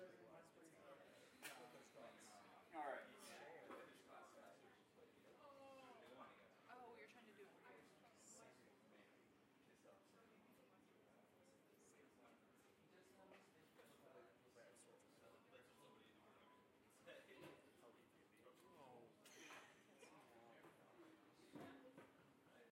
Lecture Room Tone
Sound of many people chatting in a lecture room before class starts
ambience, college, lecture, room, tone